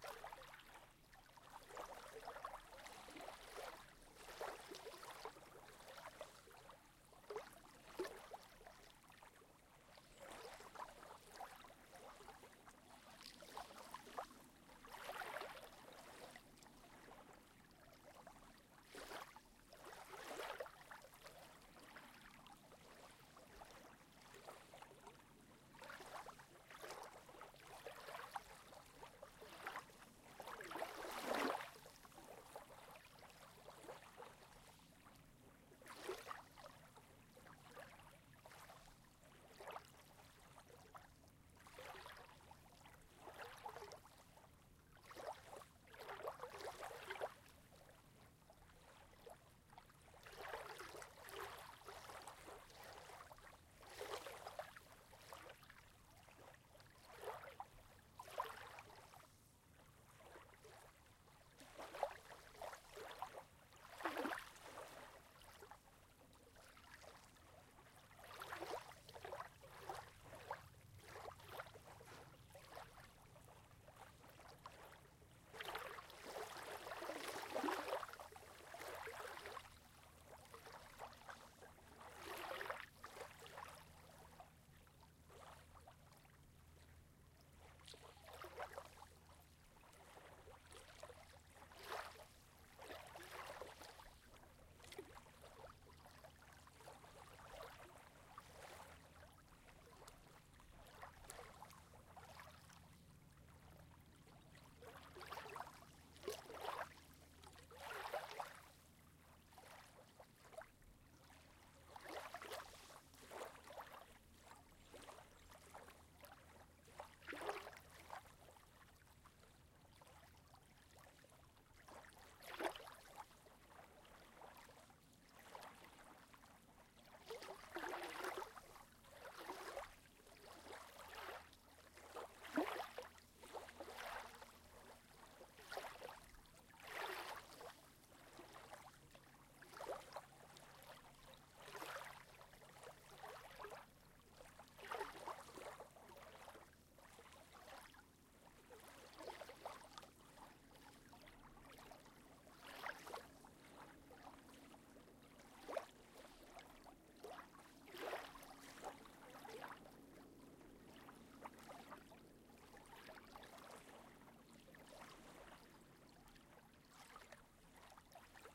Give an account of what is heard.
Small beach 50cm away
Beach, waves